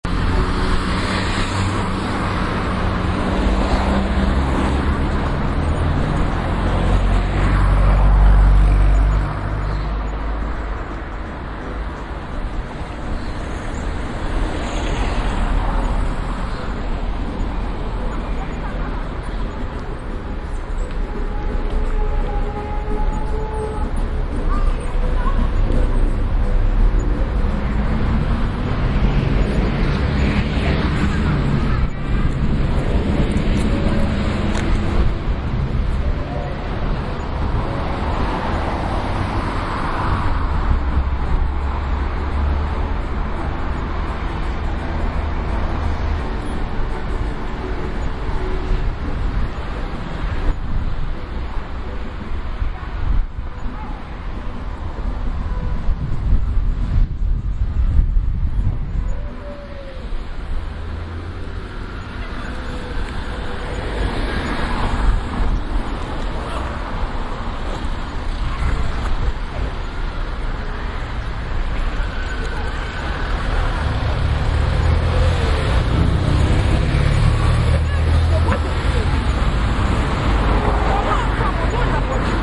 Old Street - Music from Council Estate